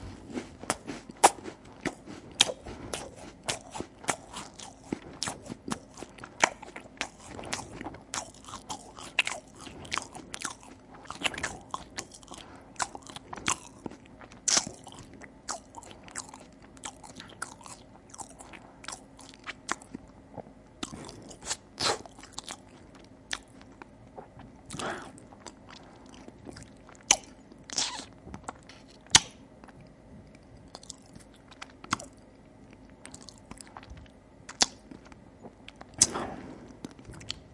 Eating loudly with an open mouth. Probably a "belegtes Brot".
Recorded with a Zoom H2. Edited with Audacity.